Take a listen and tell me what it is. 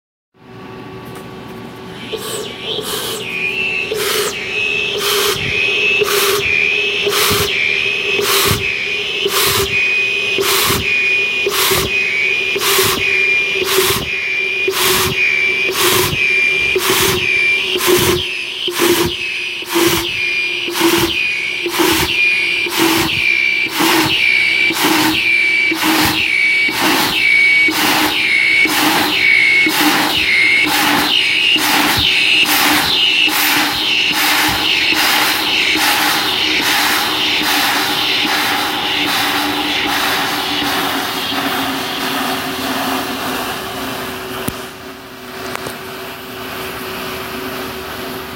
Liquid nitrogen being dispensed from a tank into a liquid nitrogen holder. I'm not sure why the tanks scream and breathe like that but they all seem to do it. The gas rushing is nitrogen that has evaporated, the sound gets lower as liquid goes through the hose.